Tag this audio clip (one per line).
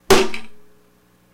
Strike
Hit